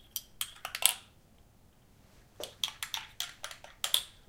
stirring cup
Stirring my coffee. Recorded with the Zoom H2 Handy Recorder, normalized in Audacity.